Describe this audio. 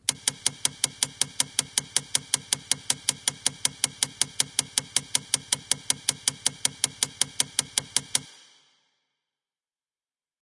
A constant Clicking Noise.